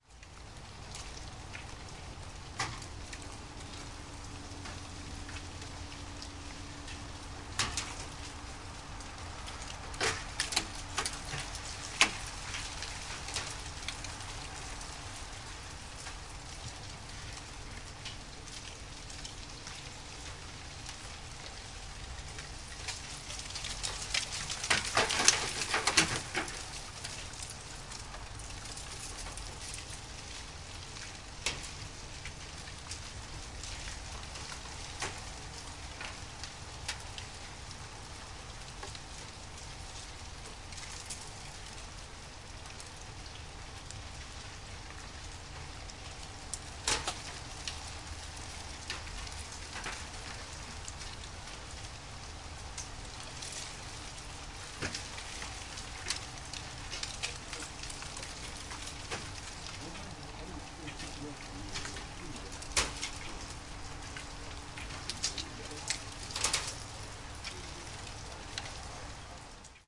IceFallingFromTreeFebruary21st2015
Ice falling from trees...with a background of various birds including starlings, house finches and Cardinals.
Recording made with the Marantz PD661 with the Oade Brothers Pre-Amplifier modification. Microphones: Samson CO-2 matched stereo microphone.
At the very beginning you hear wind-chimes.